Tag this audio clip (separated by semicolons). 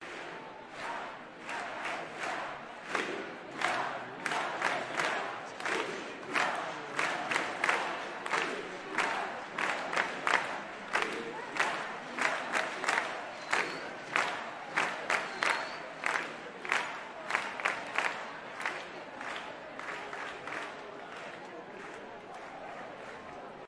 call; catalonia; manifestation; people; street; talking-in-Catalan; village